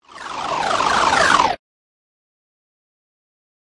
Granular sounds made with granular synth made in Reaktor and custom recorded samples from falling blocks, switches, motors etc.
granular, noise